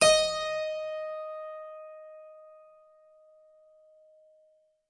Harpsichord recorded with overhead mics
Harpsichord, instrument